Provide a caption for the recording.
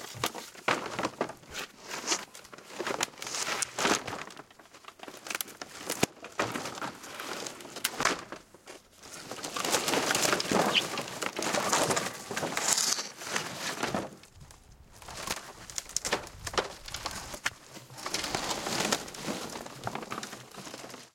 Wood panel board debris heap scramble dump close
Part of a series of sounds. I'm breaking up a rotten old piece of fencing in my back garden and thought I'd share the resulting sounds with the world!
board, close, debris, dump, heap, panel, scramble, Wood